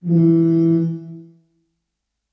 tuba note4
game, games, sounds, video